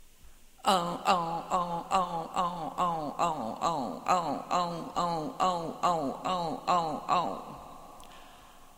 OU long 2
woman, voice, ou, vocal, female